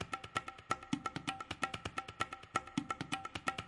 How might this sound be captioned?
A 130 bpm "perfect" loop with a nice ethnic feel, great to be used in tribal house.
130bpm, ethnic, indian, perc, percussion, percussion-loop